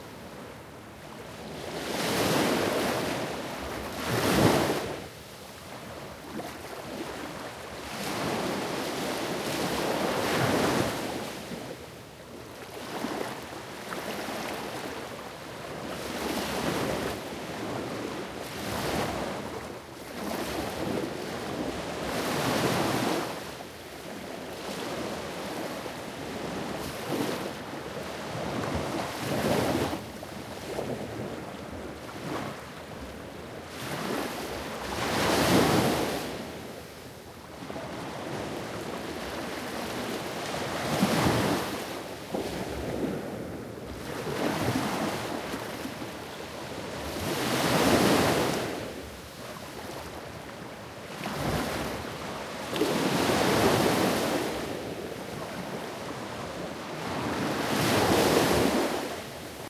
Calm Waves
Calm ocean waves lapping. Recorded in Olhão, Portugal, 2017, using a Zoom H1. Minimal processing only for reducing wind bass rumble and increasing gain.